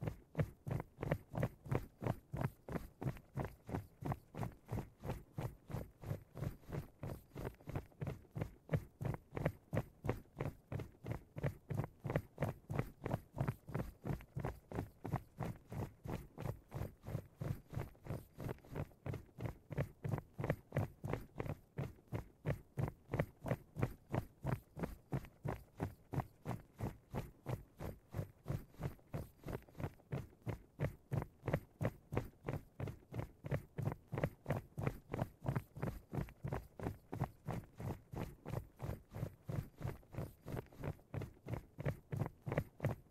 A small group of people running in unison.